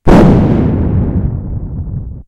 Quite realistic thunder sounds. I've recorded them by blowing into the microphone
Lightning, Loud, Thunderstorm